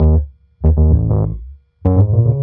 RhodesBass98BPM
riff, bass